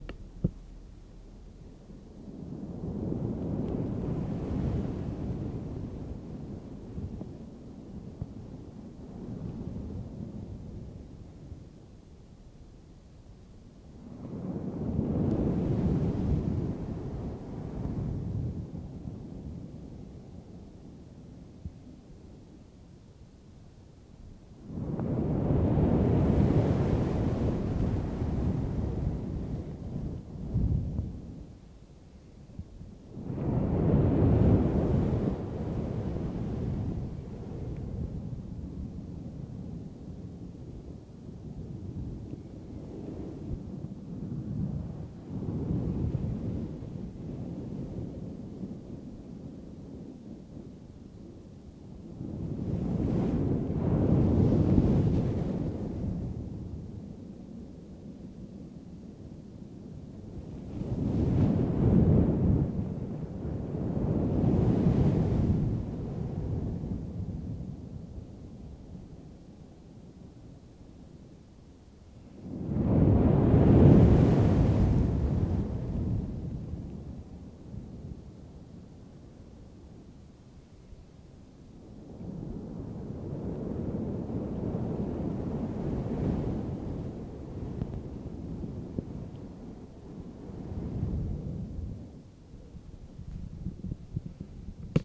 Clean Ocean Waves Foley
I created this foley by smoothly touching the microphones windshield!
It works perfectly!
Hope you find it useful!
beach, coast, coastal, sea, seaside, shore, surf, water, wave, waves